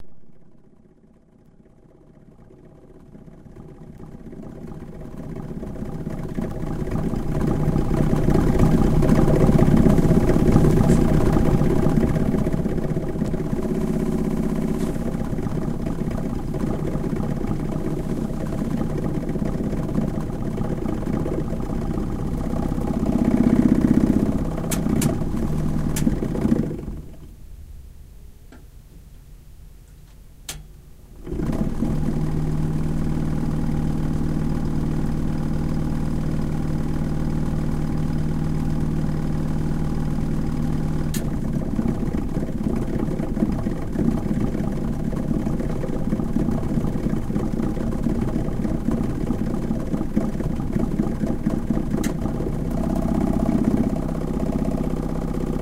Rumbling AC
Recorded April 16th '10 using a M-audio microtrackII and their provided T-mic.
An air-conditioning unit with a very rough sound to it. In the middle of the recording I turn it off, then turn it to a higher fan speed, which seems to get the motor running more smoothly, then end with it back at it's bubbling and gurgling lowest setting.